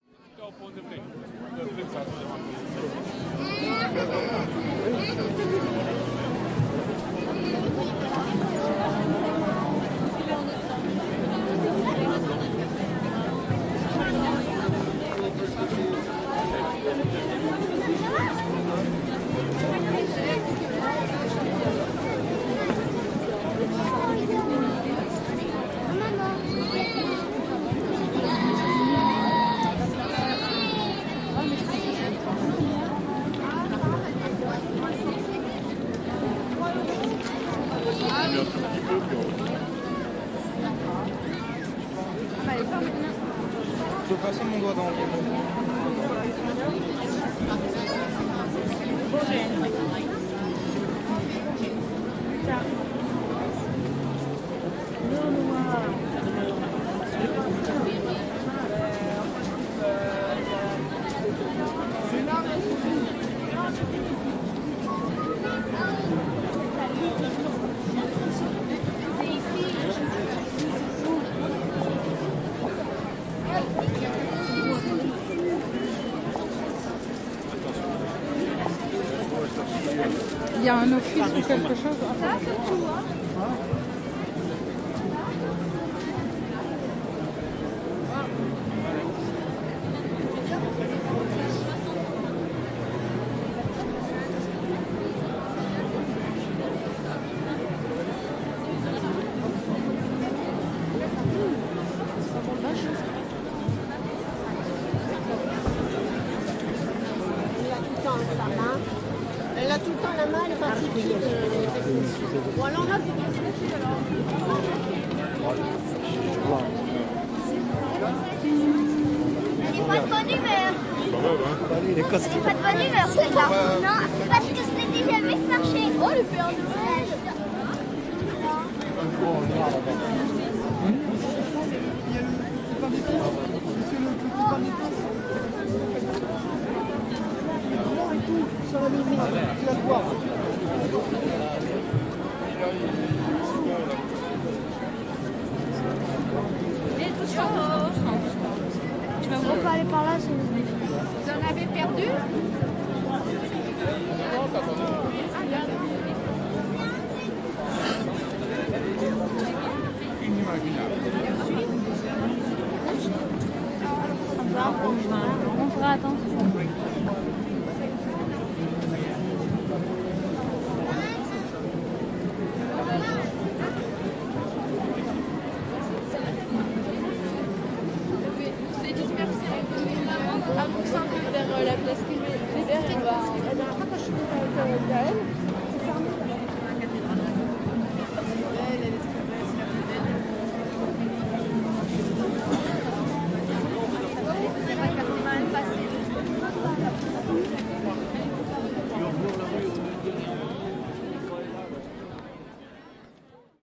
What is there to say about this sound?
The ambiance of the famous Strasbourg christmas market, known as one of the oldest in the world (first edition took place in 1570) and the city's biggest touristic event, gathering thousands of people downtown for one month at the end of every year. I took my zoom h2n in different places, capturing a slightly different mood each time. expect lots of crowd sounds, background music, street atmosphere and... a lot of different languages (french, german, spanish, english.... All recordings made in MS stereo mode (120° setting).